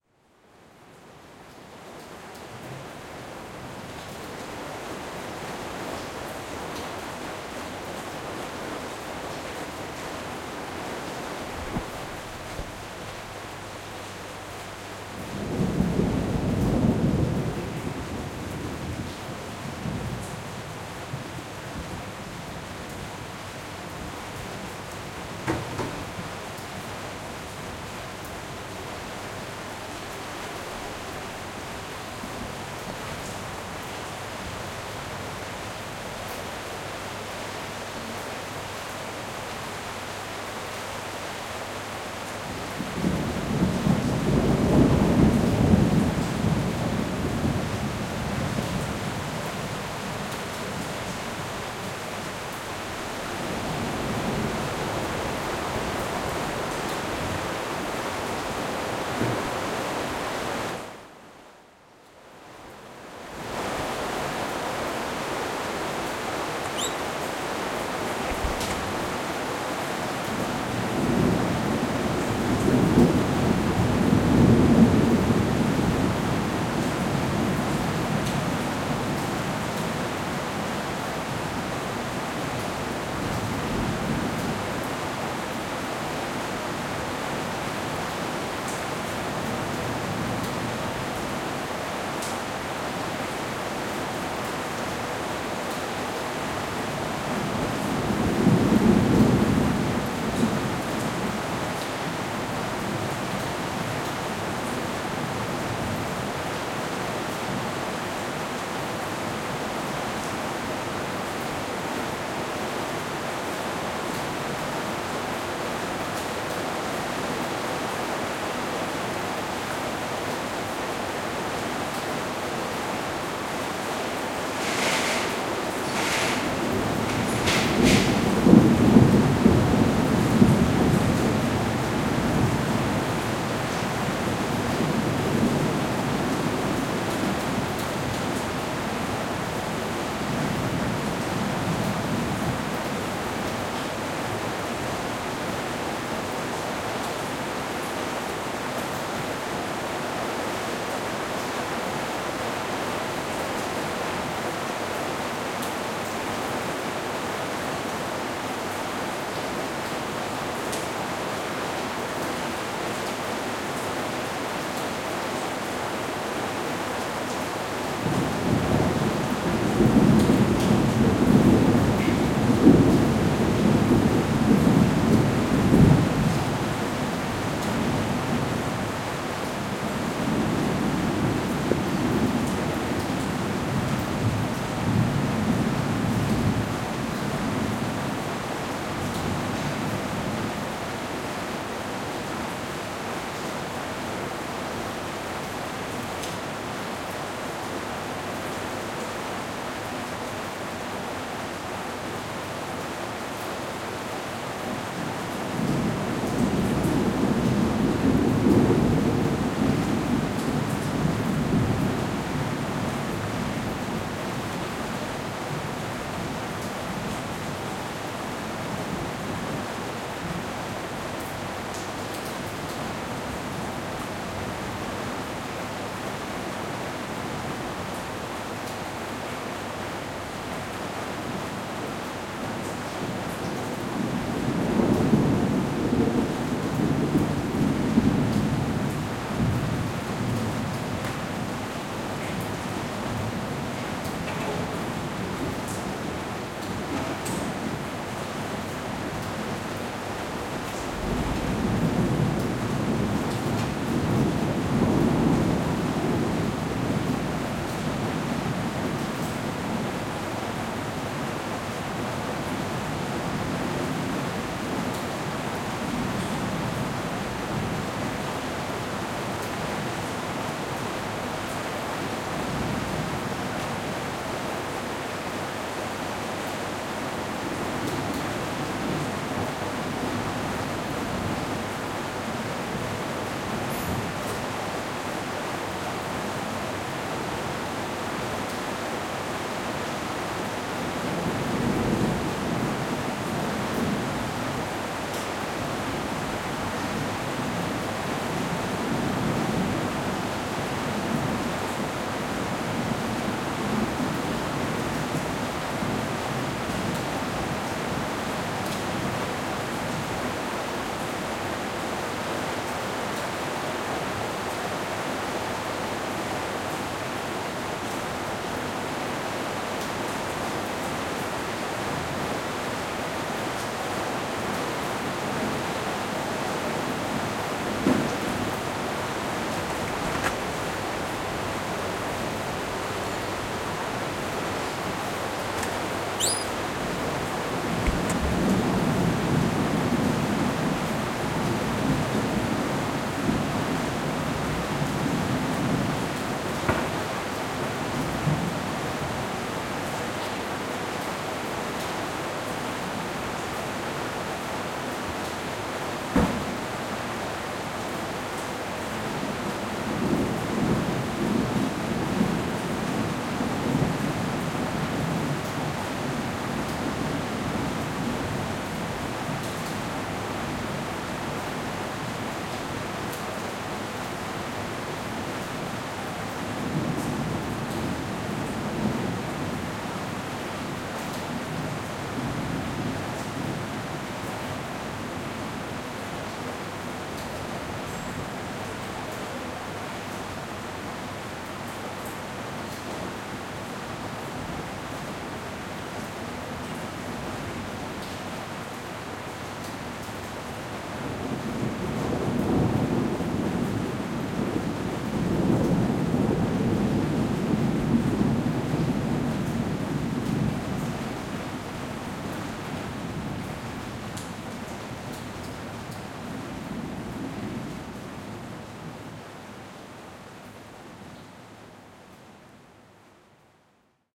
Thunderstorm in Sevilla 2018
Electric storms are not very common in Sevilla, so after a couple of days of intense activity, I recorded some thunders from my window :)
Gear: Zoom H6N built-in mics
Edit: Wavelab (just fades)